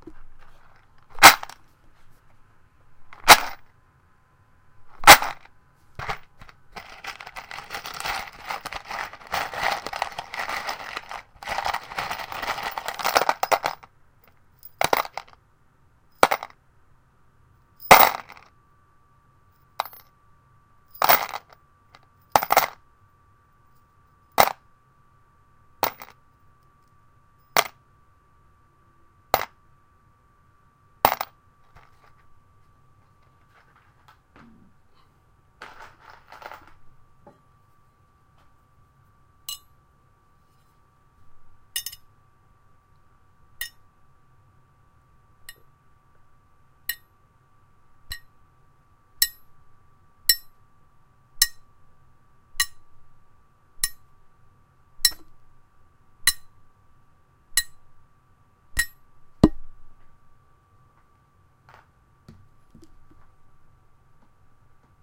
Shaking and dropping screws. (Suggested use: cut and paste sounds you like)
drop, shake, metal